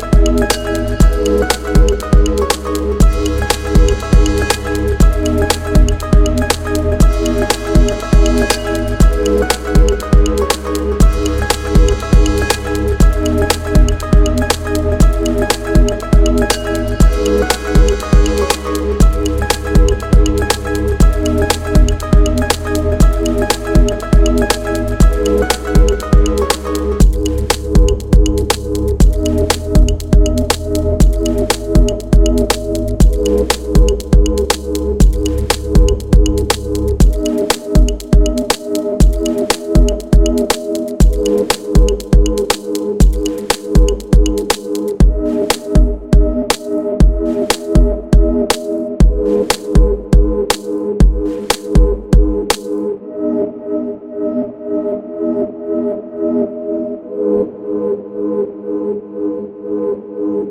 Classic Edm
Anyone love classic music ?
Listen these for your sleep before !
Dont forget this is only short audio music.
Official Website :
Music Made by AI
Classic, EDM, soft, listening, easy, instrument, medium